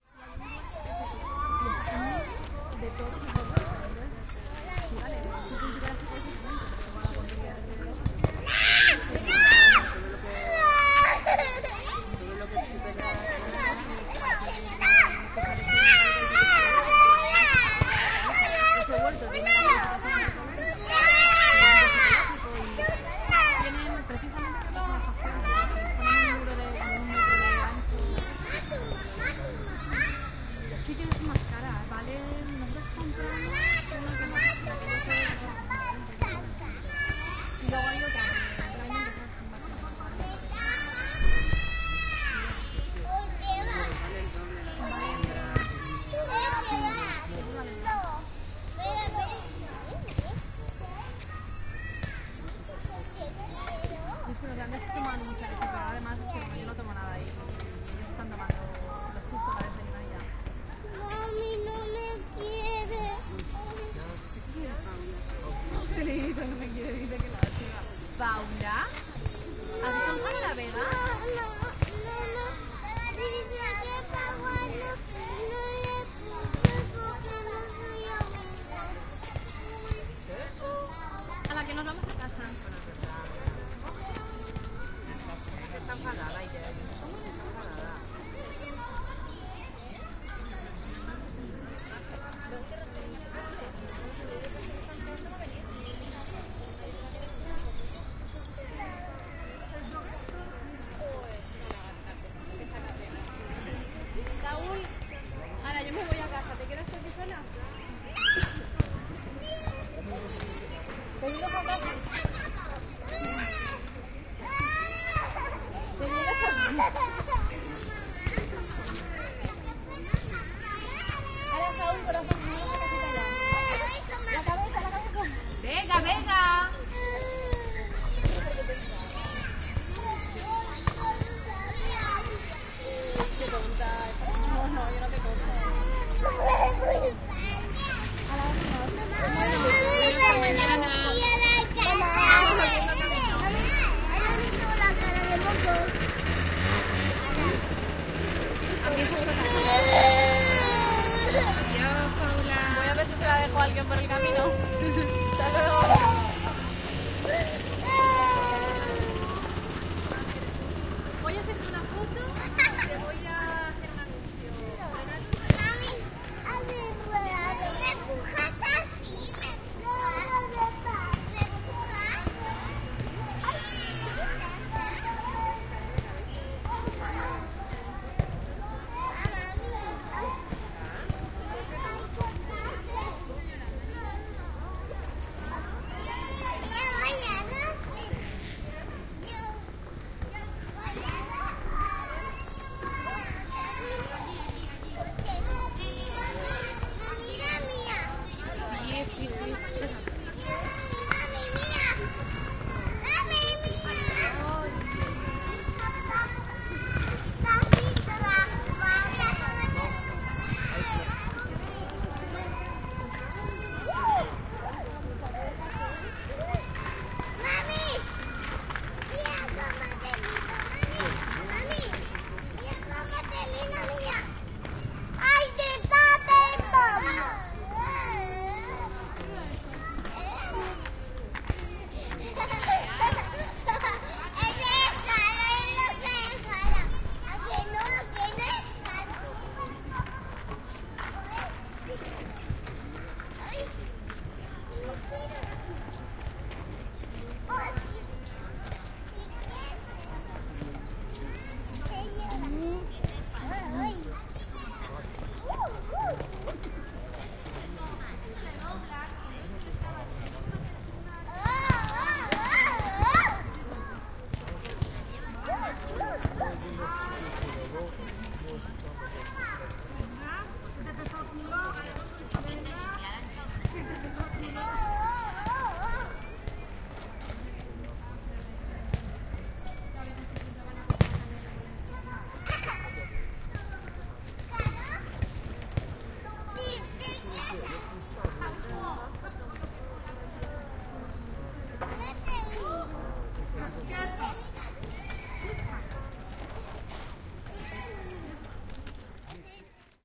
Playground with childrens
Playground with games for children. There appear children who play, run and scream while the mothers speak sittings. Understandable dialog in some occasions in Castilian.
Recorded with headword binaural microphones Soundman OKM
spanish, ambience, playground, children, people, atmosphere, mothers, play, binaural, park, kids, woman, field-recording, city